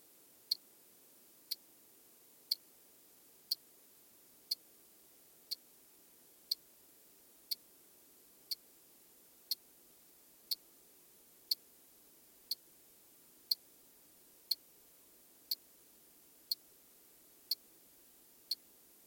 wristwatch-ticking-SE mono
Recording of a common wristwatch ticking on a sound-insulating foam padding. SE-Mic cardio, Fostex FR2. Stereo Sound available on demand. SE-Mic cardio, Fostex FR2.